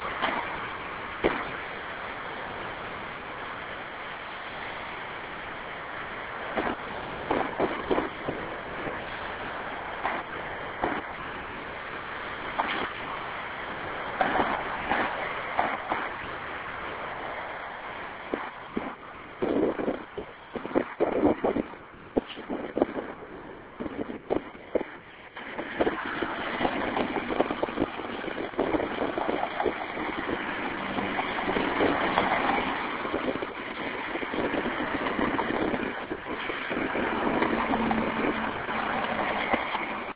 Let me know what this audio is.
Cars Passing A Bus Stop
Cars passing by a local bus stop.